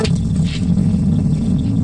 Space Ping Spacecraft Sci-fi SFX Fantasy Loop Mastered
Recorded Zoom H1N with Rode mic
Edited: Adobe + FXs + Mastered
Aliens, Ambient, Audio, Bass, Fantasy, Fiction, FX, Horror, Loop, Mastered, Noise, Outer, Ping, Scary, Science, Sci-fi, SFX, Sound, Sound-Design, Space, Spacecraft, Spaceship, Suspense, Travel, UFO